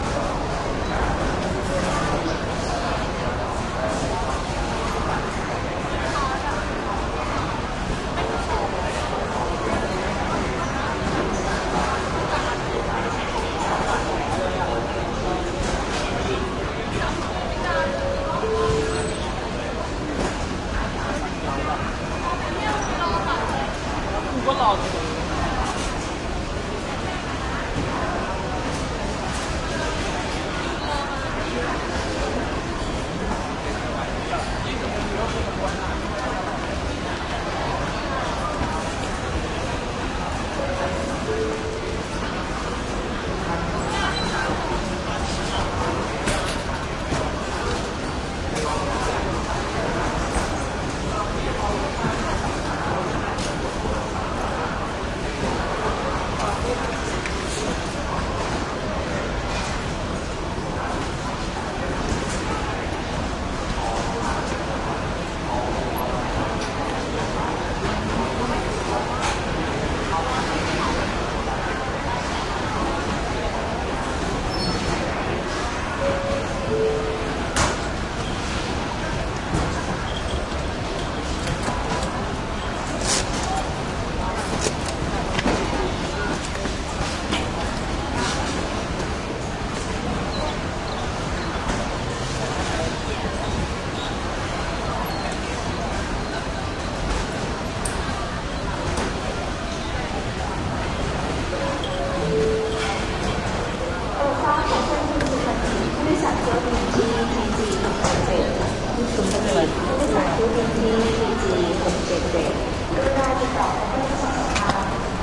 Thailand Bangkok airport baggage claim busy activity
activity, baggage, Bangkok, airport, Thailand, busy, field-recording, claim